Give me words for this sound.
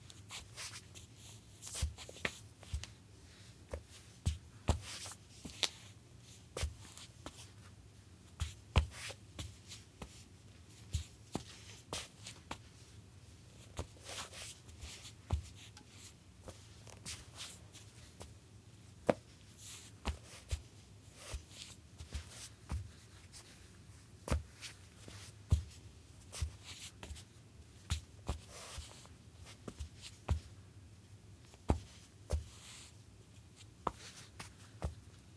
Footsteps, Solid Wood, Female Socks, Scuffs
female, footsteps, socks, solid, wood